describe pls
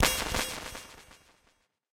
A percussive synth sound with delay.
This is part of a multisampled pack.